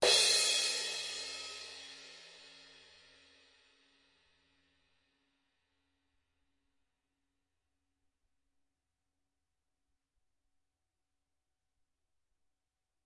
18" Paiste innovations medium crash recorded with h4n as overhead and a homemade kick mic.
crash, cymbal, h4n